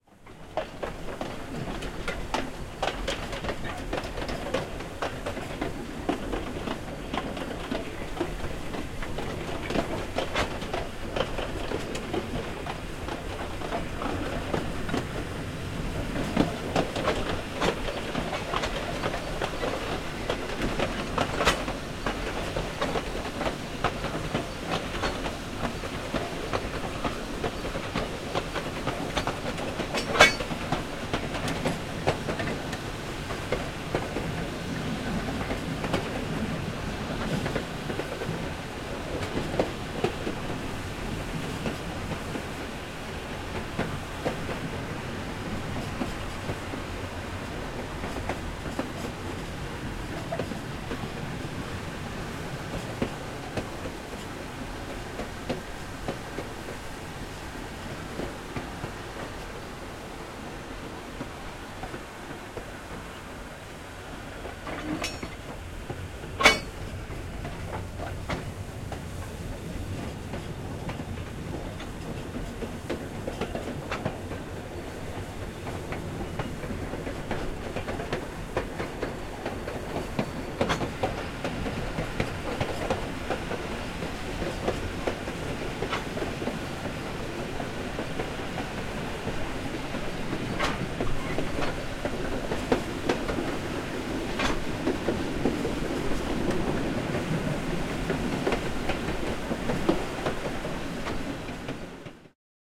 Recorded at the front of a steam train with an emphasis on the wheels. Recorded on my faithful little Zoom H4.